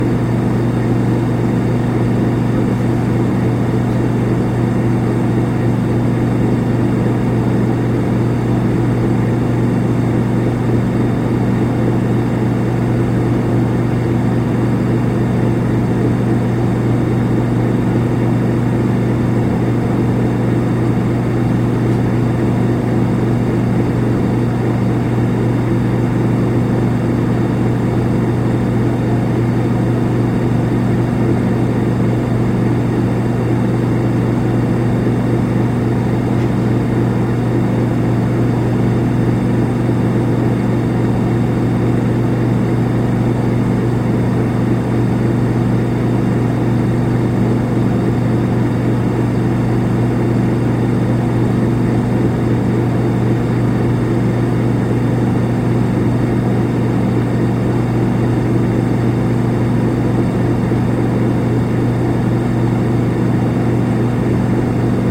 AMB-Fridge-Idle-02
The hum of a refrigerator.
Ambiance, Ambience, Appliance, Freezer, Machine, Motor, Refrigerator